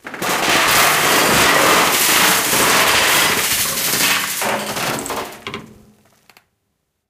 phased multistricken crash
I don't like this version. It doesn't have enough bass. I created it because some nationalist who doesn't recognize the unique identity and sentience of individuals couldn't resample it in standard Hz properties.